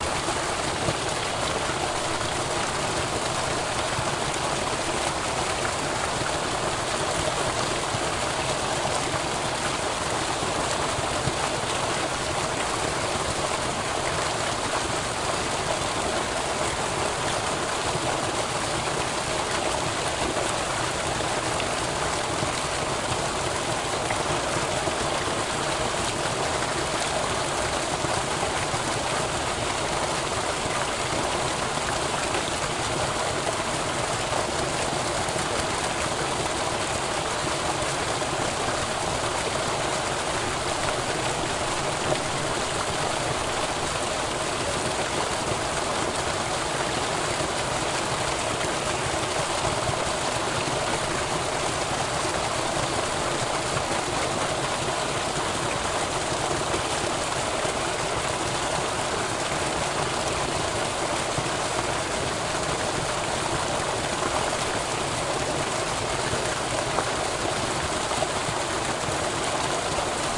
park water tube 20130317 1
City park. Tube with hot mineral water. Gurgle of pour out water into lake.
Recorded: 17-03-2013.
ambiance, ambience, ambient, atmosphere, background-sound, city, drain, field-recording, flow, gurgle, noise, park, pipe, soundscape, stream, torrent, town, tube, water, water-tube